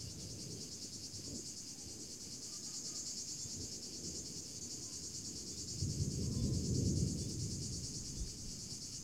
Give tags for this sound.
Storm; Field-recording; Thunder; France; Cicadas; Country; Provence; Recording; Countryside; Nature; Cicada; Field

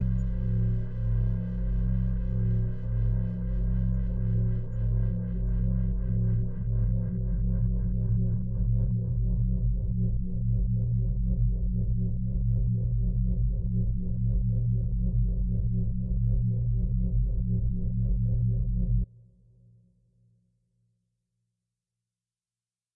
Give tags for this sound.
unsettling; drone; movement; spooky; deep; dark; ambient; atmosphere

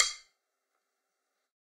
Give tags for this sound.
drum; drumkit; god; real; stick

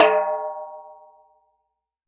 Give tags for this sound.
1-shot; multisample; tom

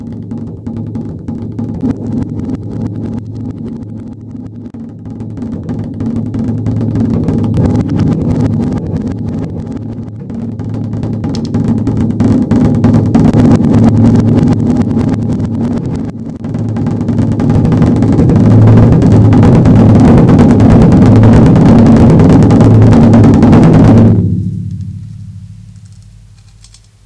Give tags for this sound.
bits; building; toolbox

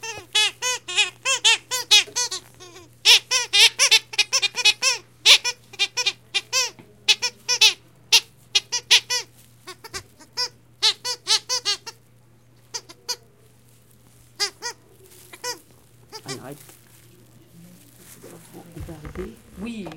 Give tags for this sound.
toy; IDES; Paris; school; France; dog

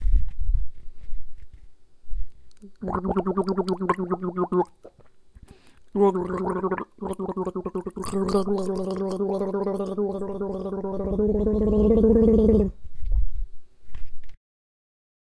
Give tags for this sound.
water; hygiene; dental; cleaning

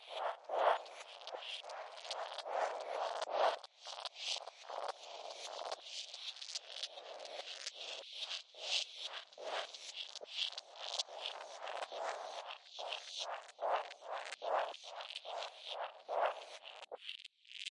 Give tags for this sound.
ableton; fx; loop

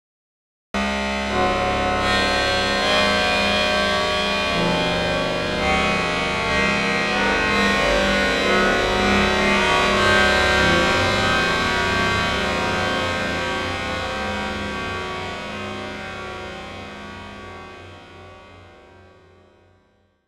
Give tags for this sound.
prepared-piano; synthesized; metallic; processed; abstract

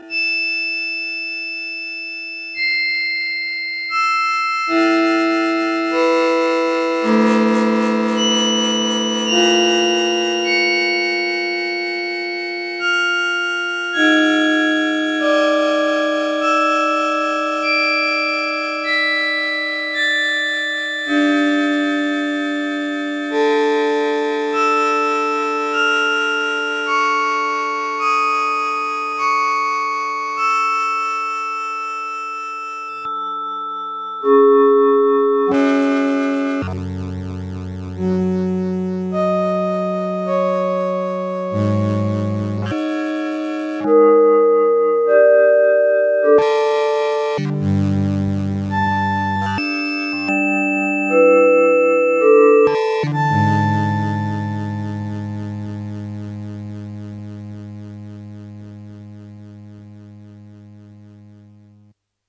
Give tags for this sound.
PSS-370; FM-synthesizer; Yamaha; Keyboard

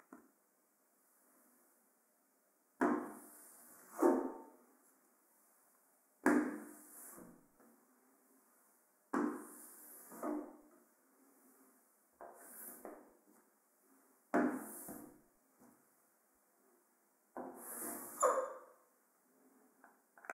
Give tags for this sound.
whoosh; window; wipe; glass

